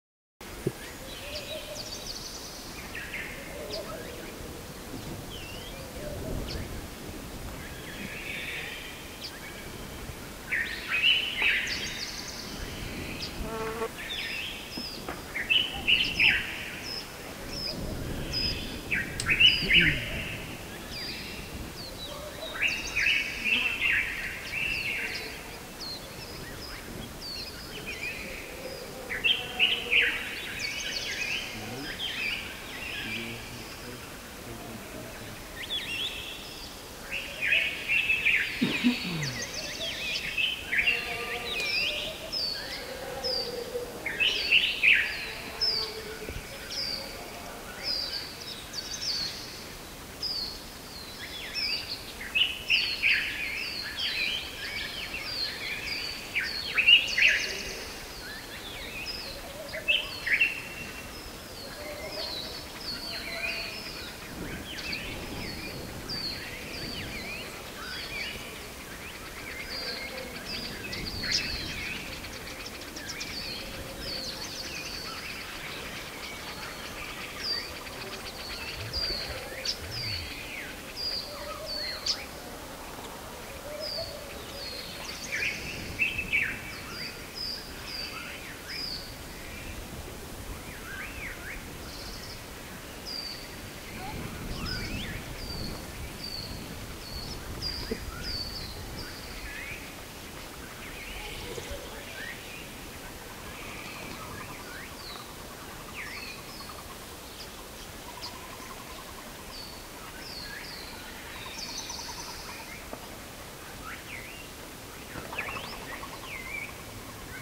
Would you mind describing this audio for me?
CAÑON AVES OMAN
A Wadi in the region of Dhofar, Oman, filled with trees and birds of all kinds. (Mono 48-24; Rode NTG-2 Shotgun Mic/PMD 660 Marantz Portable Recorder.)
magoproduction, oman, ambience, bird-singing, dhofar